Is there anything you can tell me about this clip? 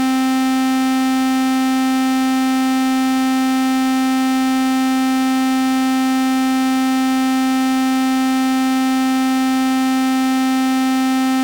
Doepfer A-110-1 VCO Rectangle - C4
Sample of the Doepfer A-110-1 rectangle output.
Pulse width is set to around 50%, so it should roughly be a square wave.
Captured using a RME Babyface and Cubase.